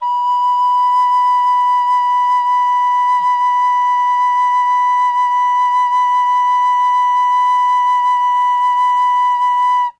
Alto Recorder B5
Quick sampling of a plastic alto recorder with vibrato. Enjoy!
Recorded with 2x Rhode NT-1A's in a dry space up close.
plastic-recorder, flauto-dolce, flute